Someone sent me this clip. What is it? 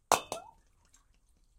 Bottle Smash FF184
2 glass bottles hitting, quiet, boing, liquid-filled
Bottle-smash, medium-pitch, bottle-breaking